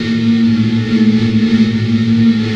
Multisamples made from the spooky living dead grain sound. Pitch indicated in filer name may be wrong... cool edit was giving wacky readings... estimated as best I could, some are snipped perfect for looping some are not.